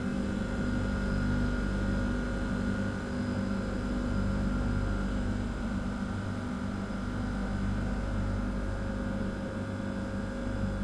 this noise of a ultra-freezer made me think of the sound a reactor inside a spaceship